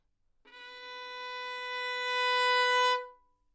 Violin - B4 - bad-dynamics-crescendo
Part of the Good-sounds dataset of monophonic instrumental sounds.
instrument::violin
note::B
octave::4
midi note::59
good-sounds-id::1846
Intentionally played as an example of bad-dynamics-crescendo
single-note, neumann-U87, multisample, violin, good-sounds, B4